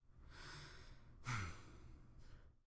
Human Male Sigh
Voice, Expression